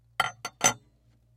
Utensil Hit FF383
Utensil hitting hard surface hits twice
hard, Utensil, surface